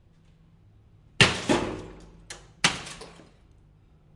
The house opposite of mine gets a new roof. The workers throw the old tiles down into a container in the street. Marantz PMD670 with AT825 recorded from some 5 metres away. Unprocessed.
stone, breaking, rooftiles, tiles, break, field-recording, crushing, rooftile, crush, tile, construction, stones